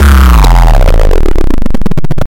weird and angry 8 bit sound which was used for a boss appearance
8, 8bit, arcade, chiptune, game, retro, bit